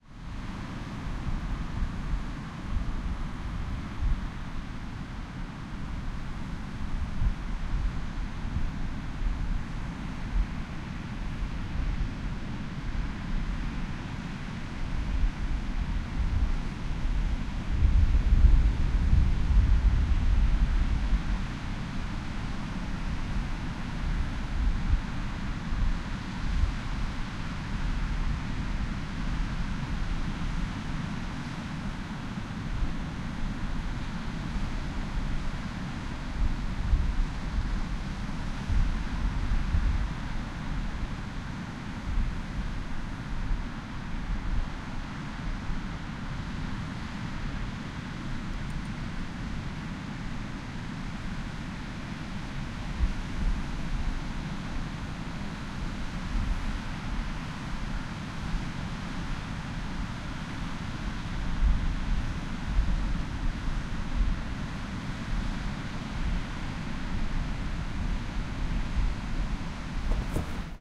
Sounds of ocean waves, about 50 feet away from the water. Some wind noise.